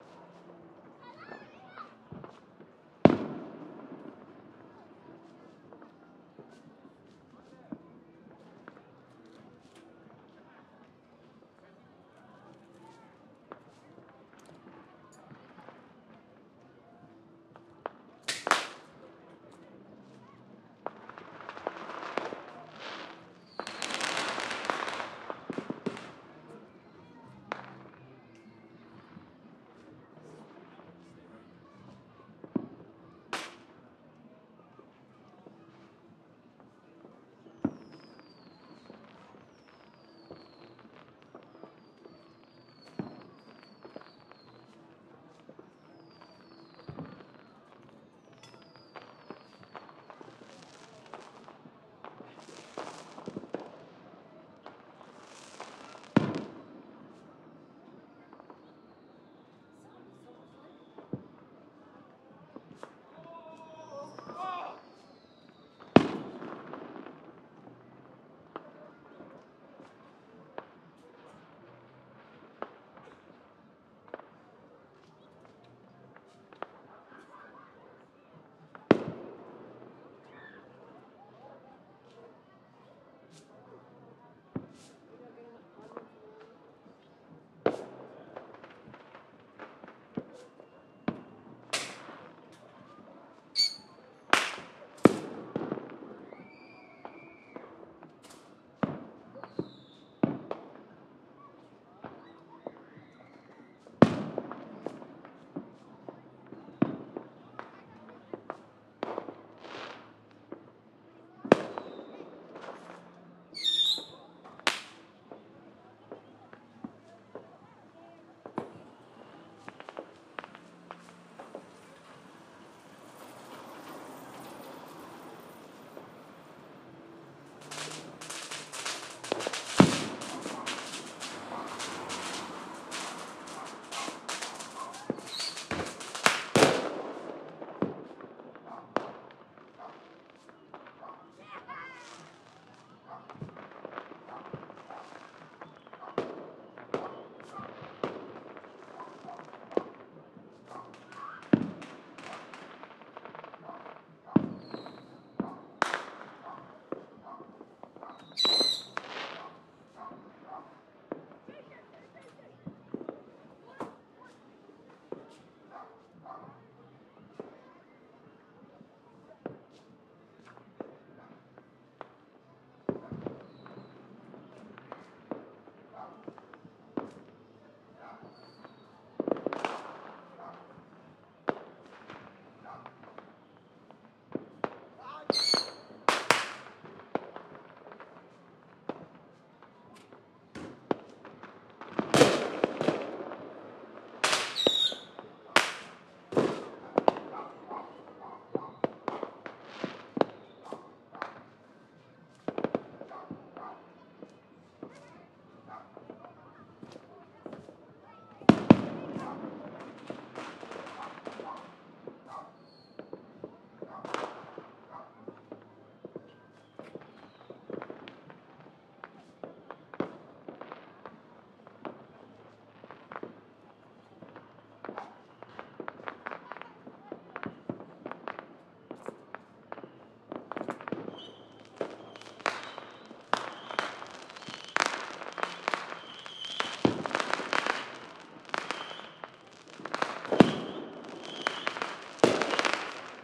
AMB S FIREWORKS 2014
I recorded 4th of July festivities in my east Los Angeles neighborhood with a pair of Beyerdynamic MC930s, connected to a Sound Devices 702T recorder. Unfortunately, like a jackass, I forgot to properly charge my battery, and the recorder quit after a mere 4 and a half minutes. It is what it is for this year; I will record more next year.
battle, bomb, boom, explosion, fire-crackers, firework, fire-works, fireworks, fourth-of-july, missile, rocket